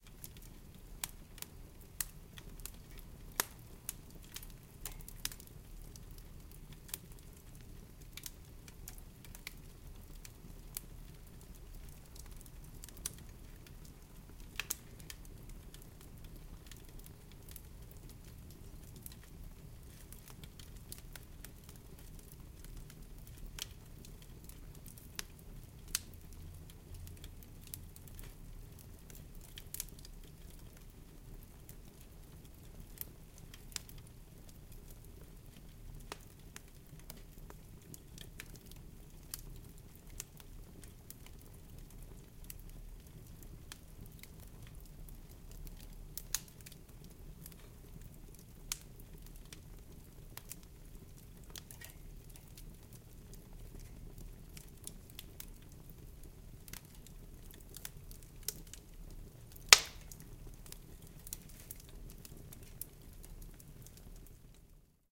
Fireplace (light)
Recorded with a cardioid MSH6 capsule - stereo mic open at certain extent - (via the ZOOM H6) the fireplace's sound gives a more discreet impression.
burning, wood, small, fire, fireplace